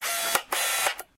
lens zooming in and out
The sound of my Nikon digital SLR zooming in and out.
stereo, Nikon, recorded, fine, Digital, sound, lens, robotic, auto, h1, zoom, arm, tune, adjustment, zooming, high, SLR, automatically, telephoto, quality, camera, sample, robot, out